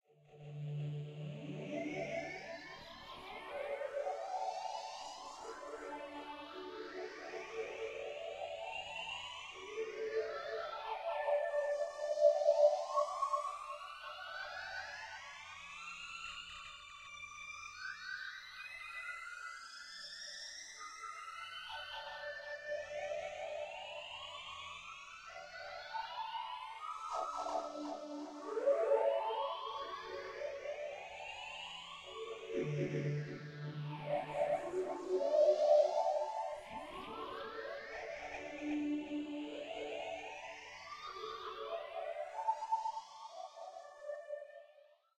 Dmaj-whale pad
A pad I created for my music. Used in "Elements" LP, track 2 "Water basin". In Dmaj.